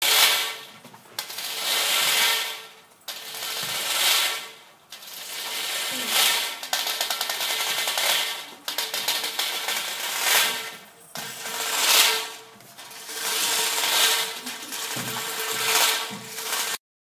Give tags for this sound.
field; recording

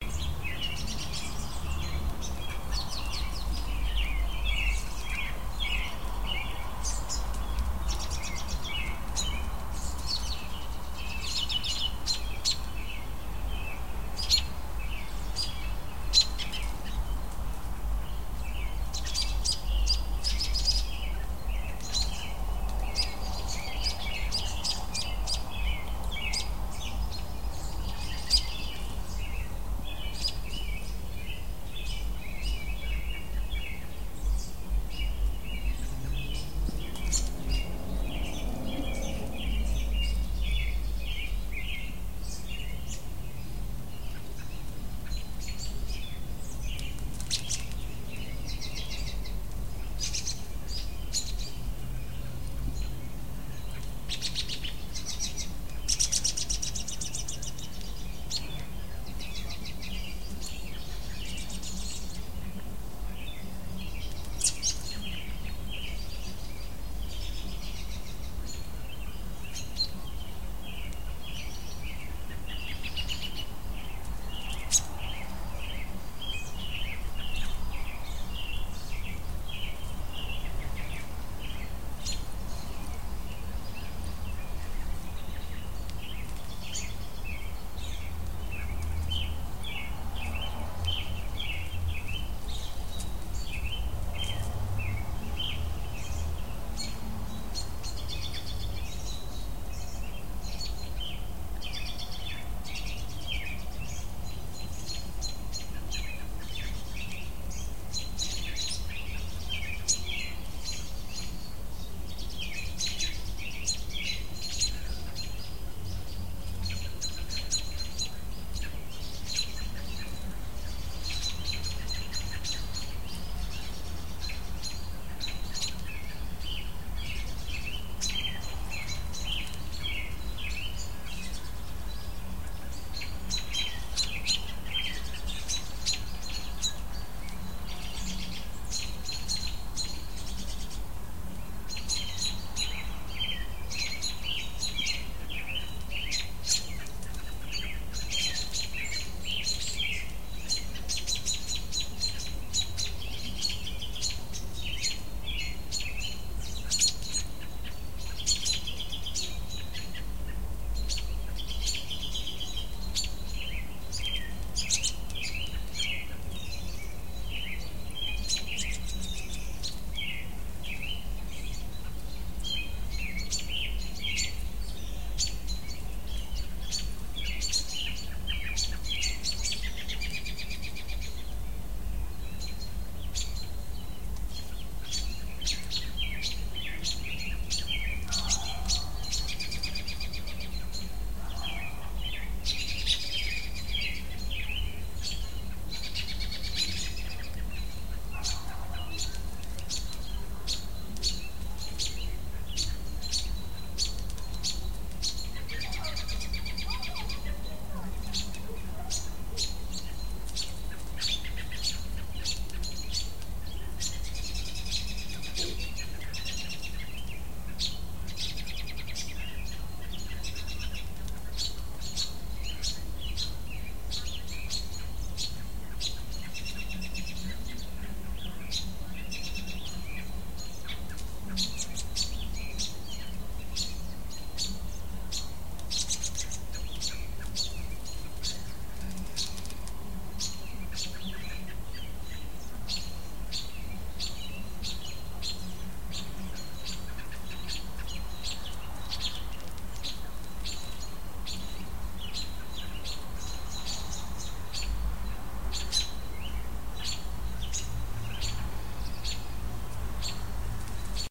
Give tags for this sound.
bird; town; birdsong; birds; spring; evening; Atchison

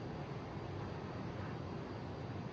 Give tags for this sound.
ship; swim; swimming; ocean; sea; strategy; game; military; tbs; unit; noise; seafaring; army; rts; water

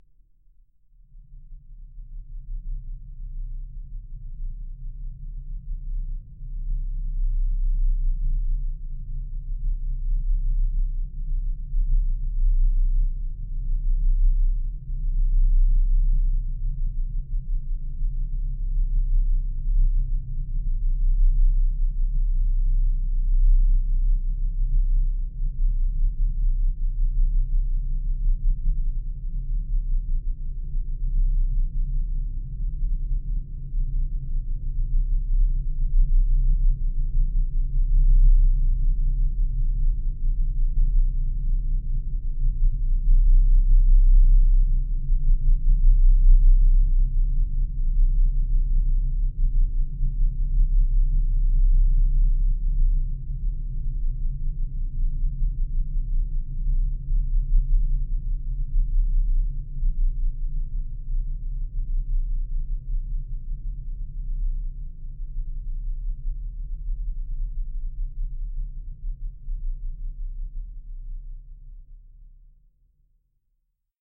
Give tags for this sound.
background; drone; industrial; multisample; soundscape

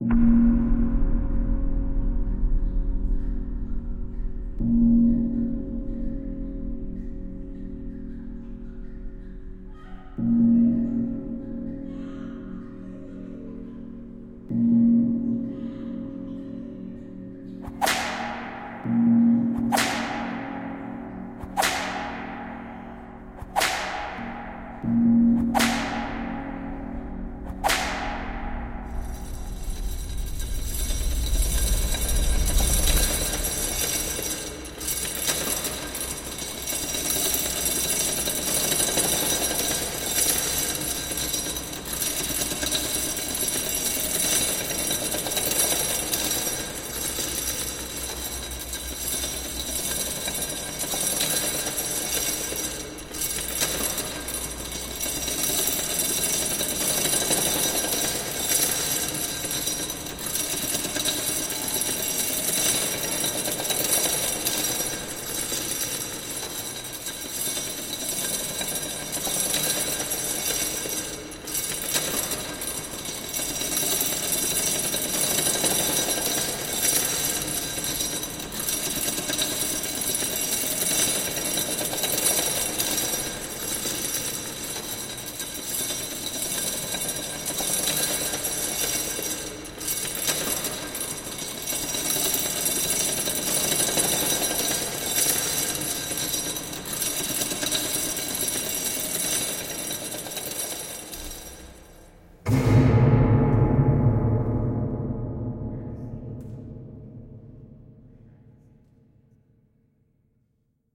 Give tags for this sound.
dnd5; ambience; voice; chains; dnd; danger; whip; gong; tension

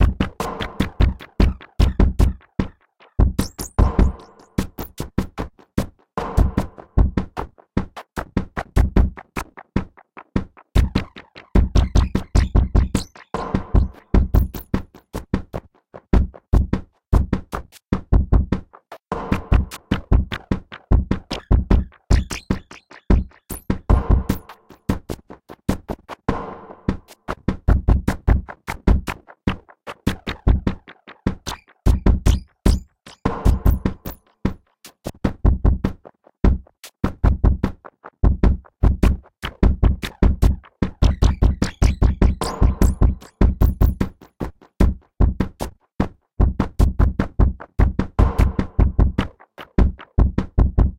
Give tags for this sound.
150bpm; drums; granular; loop; percussion; reaktor6; rhythm

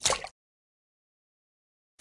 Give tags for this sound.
Wet; crash; Running; pouring; blop; aqua; bloop; Movie; Drip; pour; Water; Lake; Dripping; River; Run; Splash; Game; Slap; aquatic; marine; Sea; wave

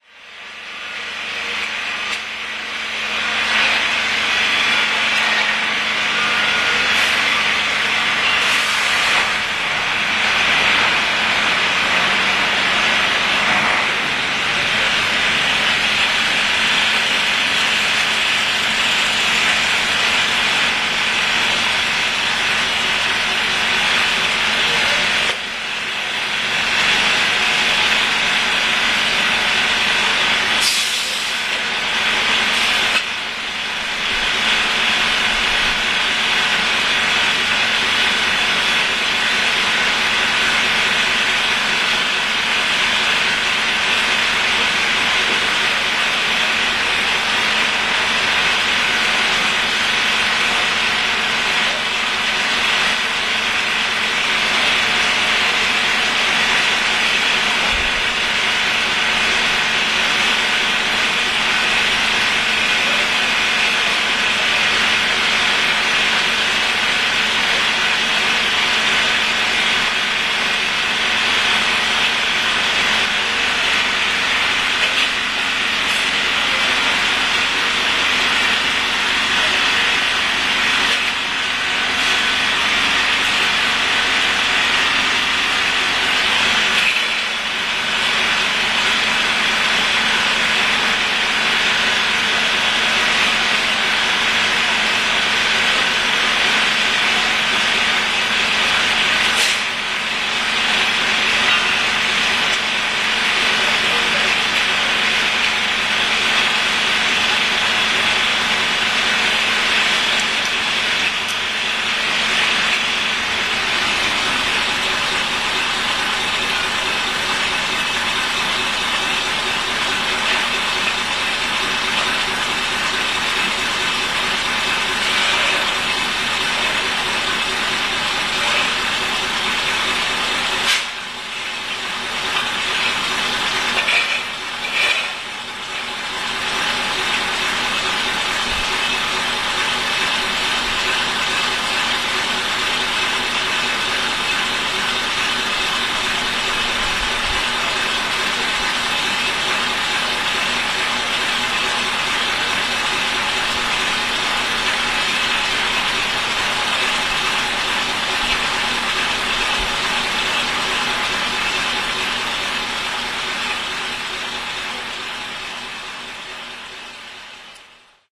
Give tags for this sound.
cars; field-recording; lorry; poznan; street

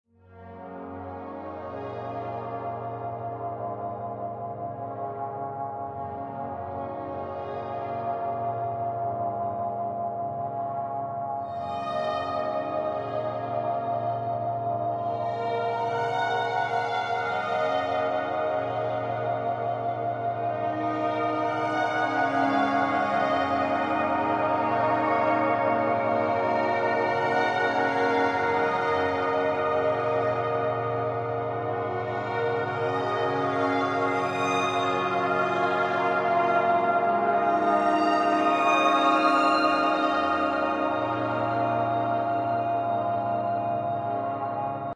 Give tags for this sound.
Journey; Adventure; Passion; Thought; Wonder; Warmth; Excitement; Love

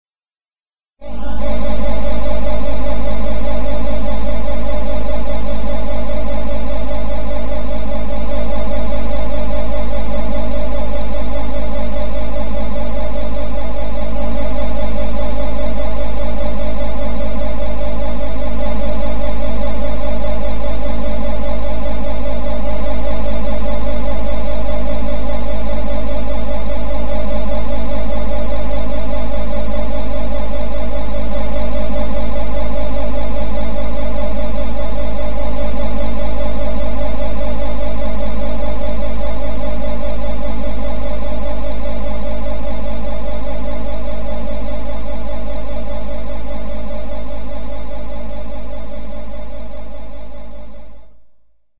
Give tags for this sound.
Factory; Fusion; futuristic; Mechanical; sci-fi; strange; synthetic; unusual; weird

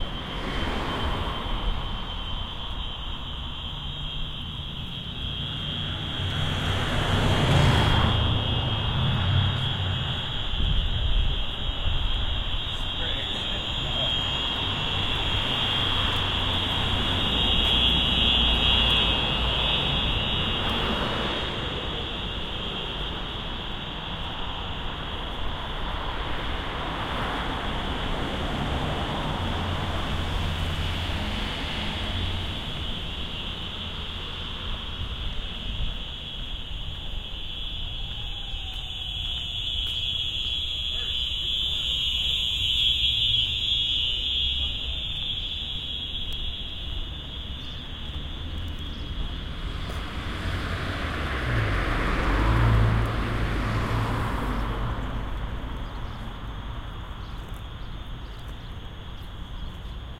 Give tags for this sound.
loud; noise; security-system; binaural; annoying; harsh; outside; alarm; fire

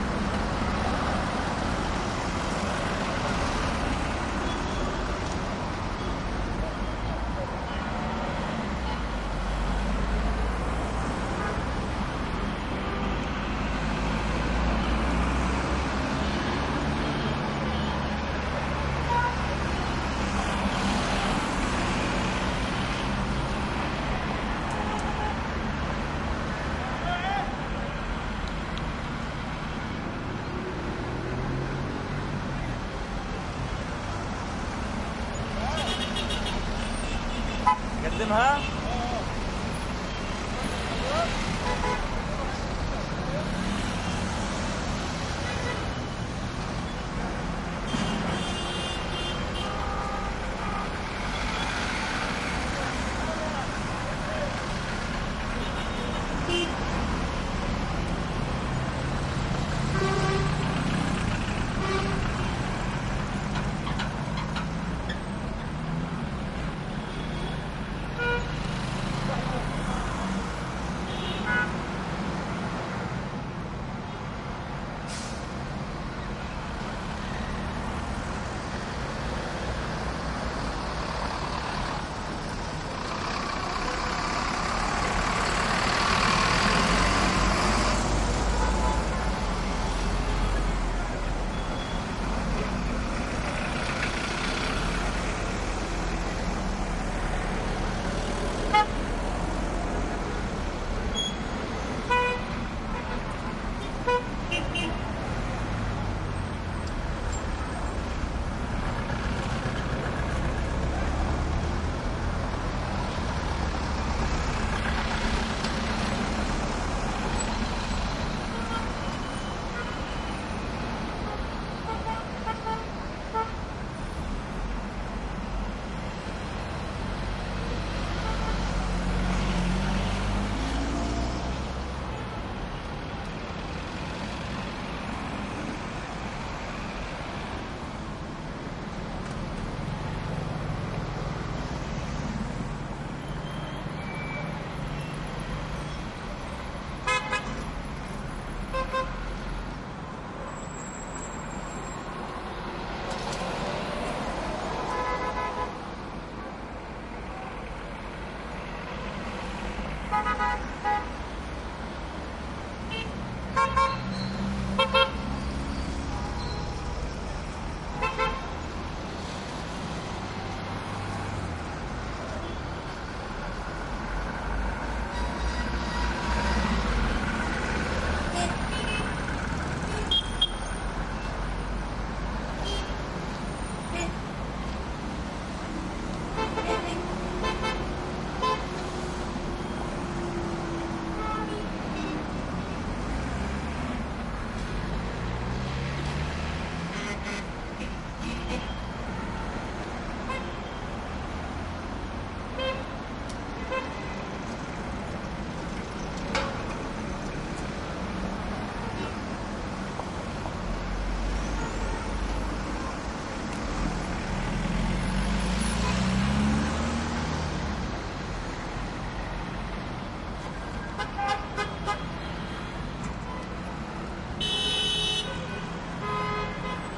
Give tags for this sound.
Middle; traffic; East; boulevard

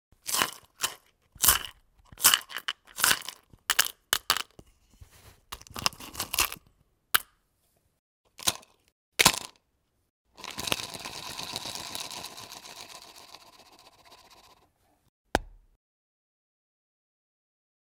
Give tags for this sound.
close-up; vaso

